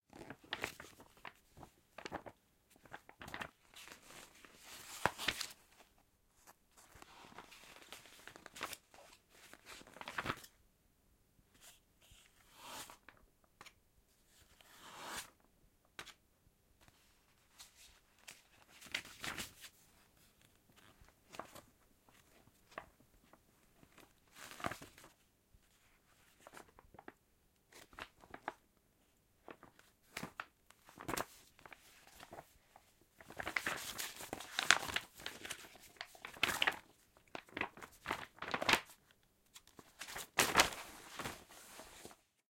Recoreded with Zoom H6 XY Mic. Edited in Pro Tools.
Person reads a journal, then shakes it and even drops it.